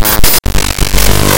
Glitch production element sourced from an Audacity Databending session